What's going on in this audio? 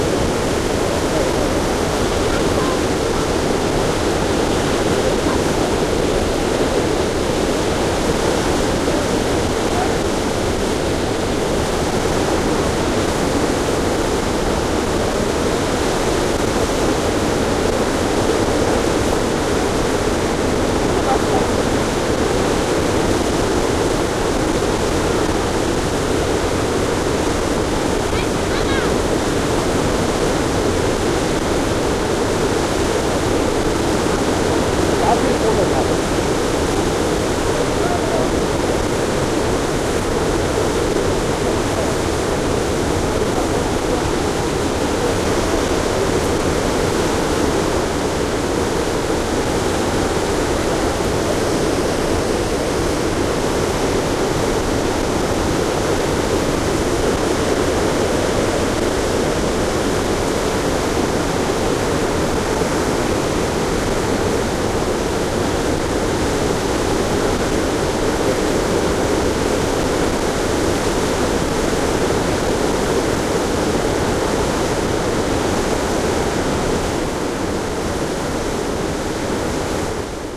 20090823.gulfoss.waterfall.02
quite close, noise of the well-known Gullfoss waterfall, Iceland. Some voices in background. Shure WL183, FEL preamp, Edirol R09 recorder
field-recording, water, nature, waterfall, iceland